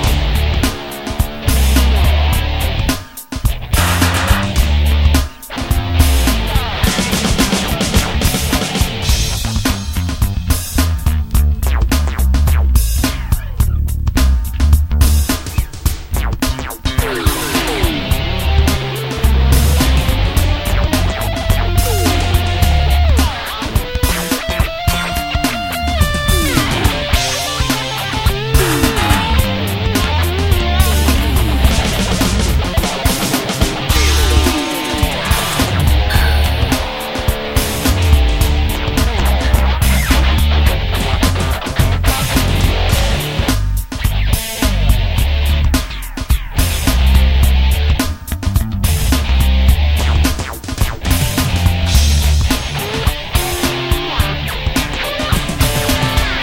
Metal Hop Loop
I created these perfect loops using my Yamaha PSR463 Synthesizer, my ZoomR8 portable Studio, Guitars, Bass, Electric Drums and Audacity.
All the music on these tracks was written by me. All instruments were played by me as well. All you have to to is loop them and you'll have a great base rhythm for your projects or to just jam with. That's why I create these types of loops; they help me create full finished compositions.
Backing, Bass, Beats, Blues, BPM, Classic, Country, Dub, Dubstep, EDM, Free, Grunge, Guitar, House, Jam, Keyboards, Loops, Music, Rap, Rock, Synth, Techno, Traxis